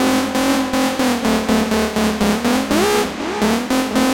Synth stabs from a sound design session intended for a techno release.